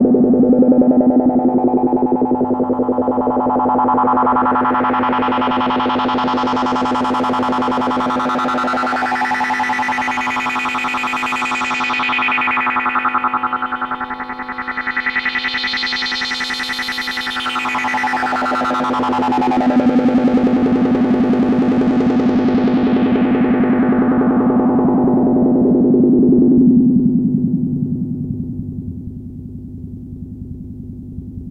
VCO BugCrush Dwasp1
This sweep sound (WASP filter) of single VCO being mutilated by a "bugcrusher" module. Use it to scare children and the very elderly.
modular, sweep, synthesizer